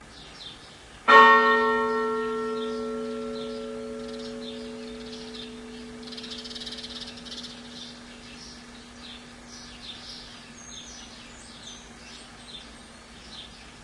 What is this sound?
sorana bells uno casa
Single chime from church tower (campanile) in medieval hilltop town in Tuscany, Italy.
Slight background reverberation as taken a few "streets" away from actual tower.
Sound recording while filming with Sony Camcorder.